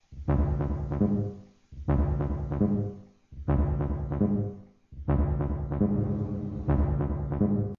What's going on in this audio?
beat with kaoos
kaoos, mix, sample